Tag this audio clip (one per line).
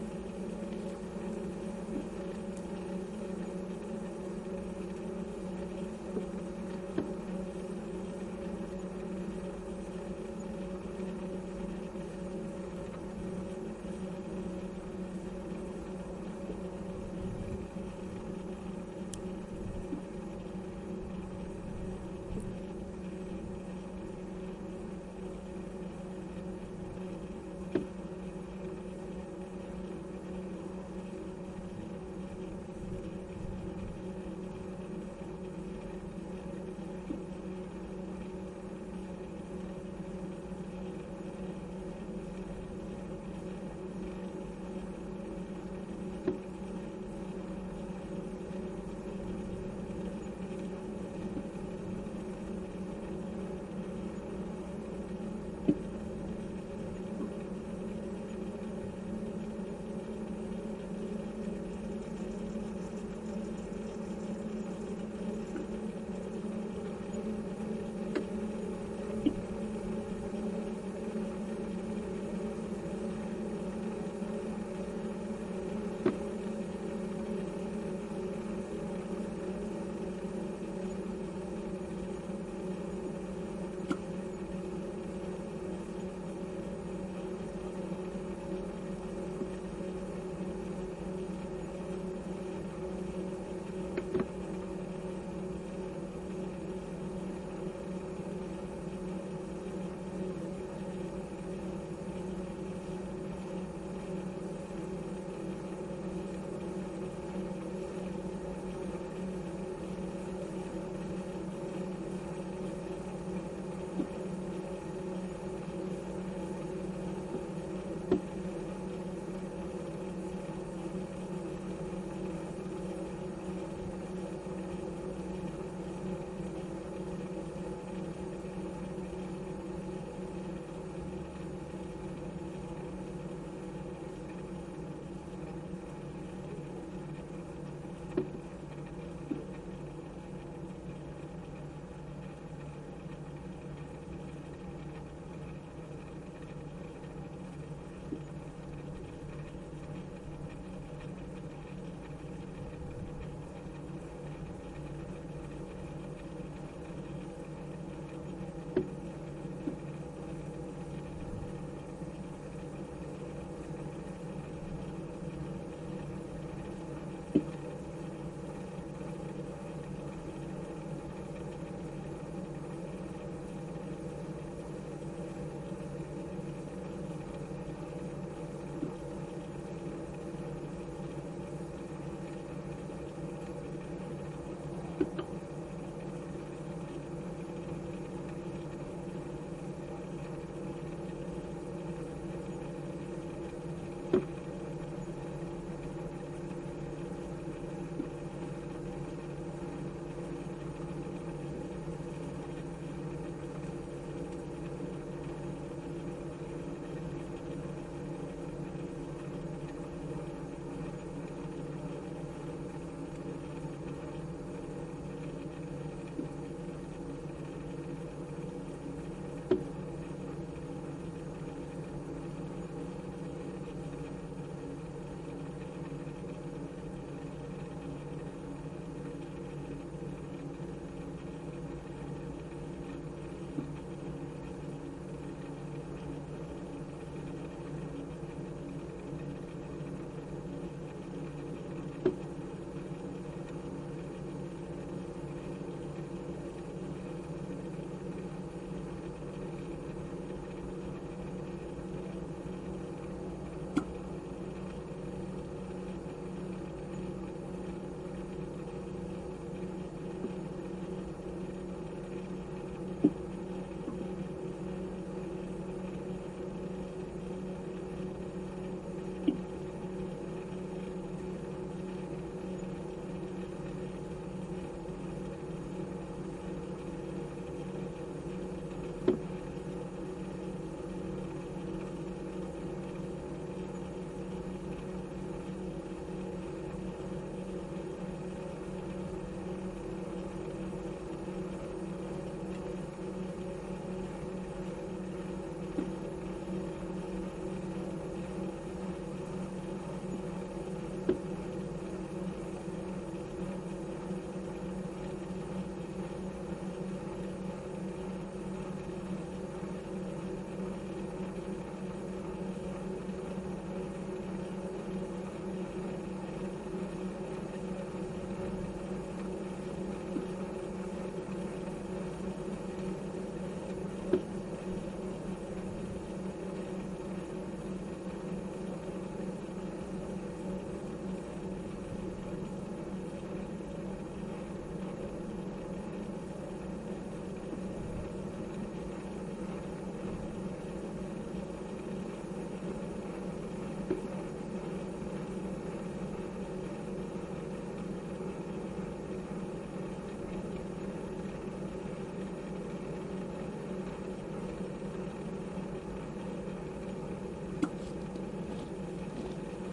cables
old
railway